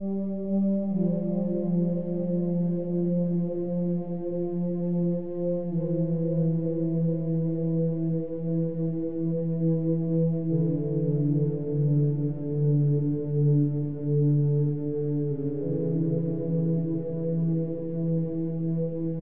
100 12 inch nails og pad 01
12, free, loop, nails, pad, sound